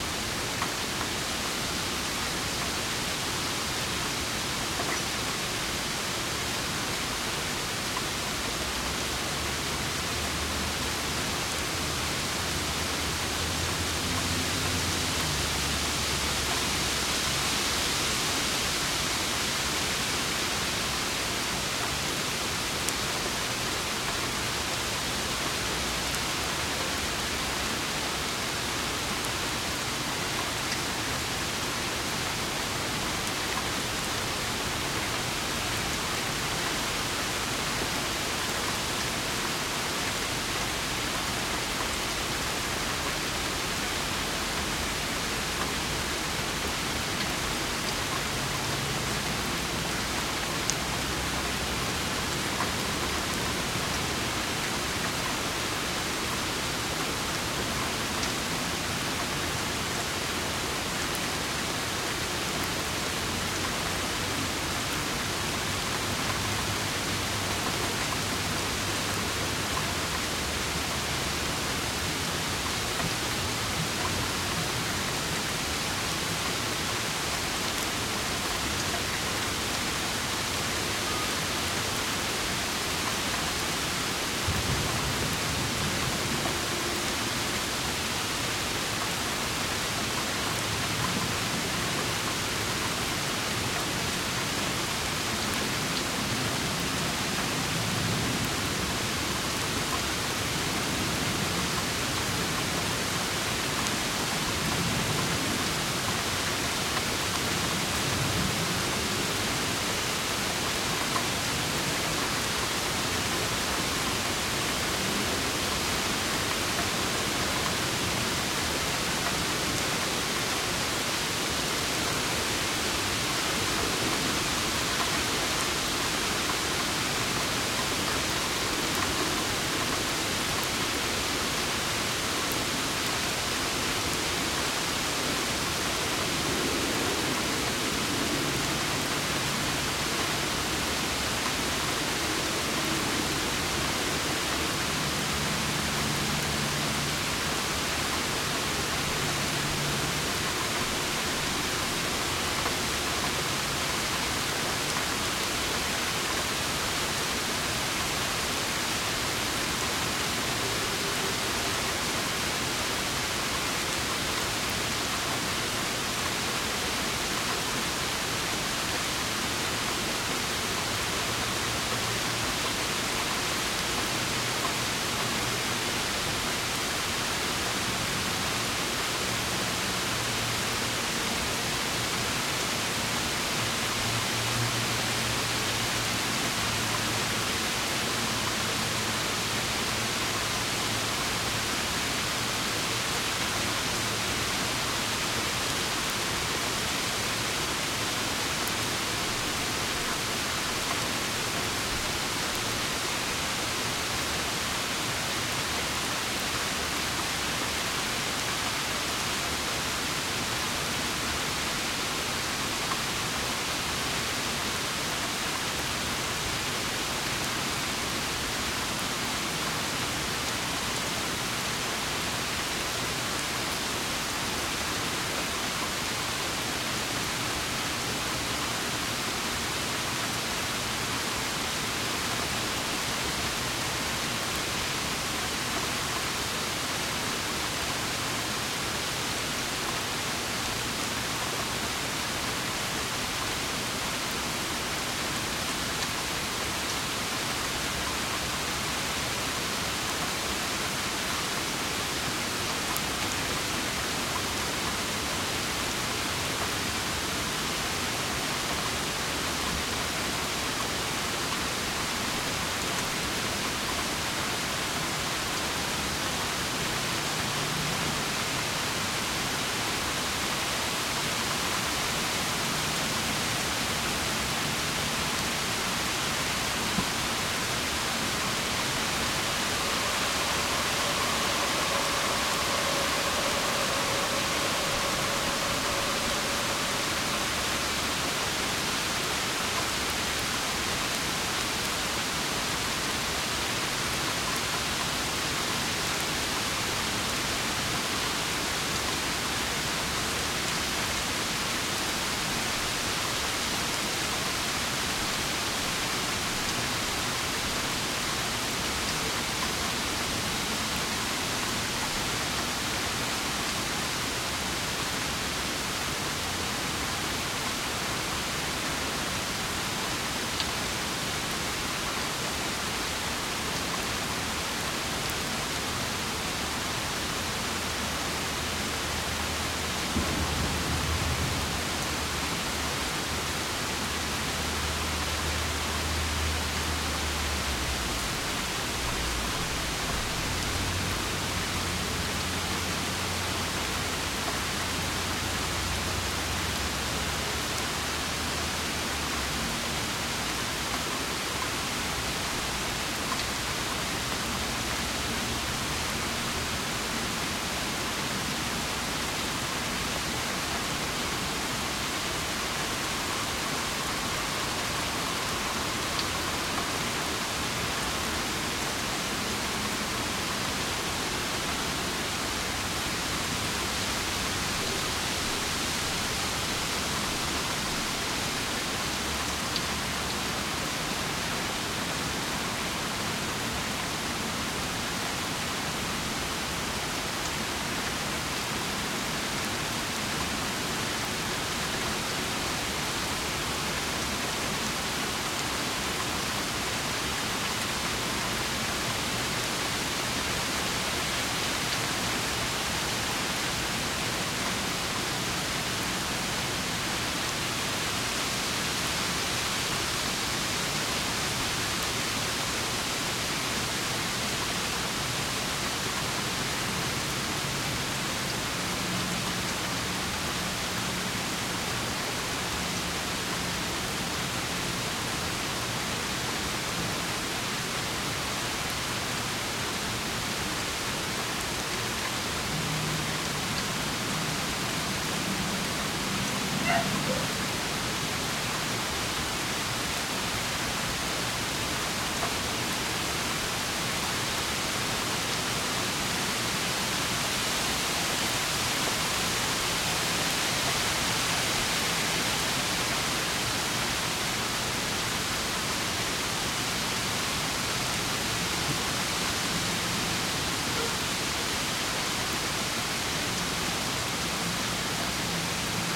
Stuck my condenser mic out my window during a rain storm and recorded for a few minutes. High passed around 150Hz and compressed a little to bring up the volume.
Rain, Wind, Weather, Field-Recording, Rumble, Environment, Nature, Atmosphere, Storm